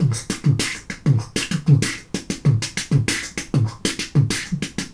Loop 2 12 boom&pop (90bpm)
I recorded myself beatboxing with my Zoom H1 in my bathroom (for extra bass)
This is a beat at 90bpm with plenty of boom and pop.
boomy, pop, loop, Dare-19, rhythm, rhythmic, 90bpm